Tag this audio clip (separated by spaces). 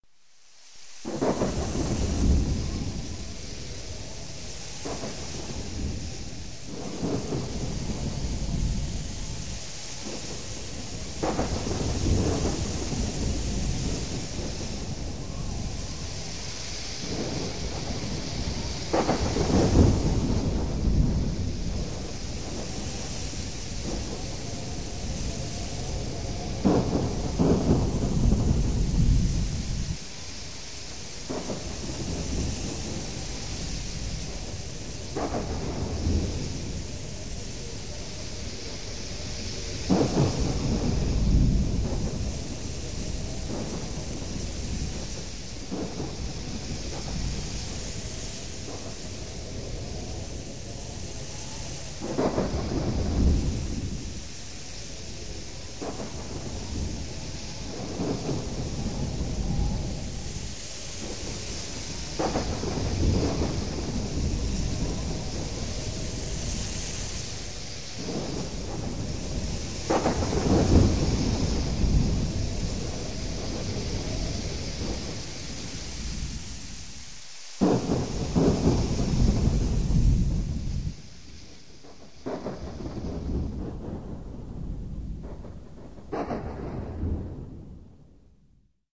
nature thunder wind rain Storm